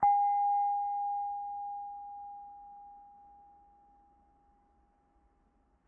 Crystal glass tapped with soft object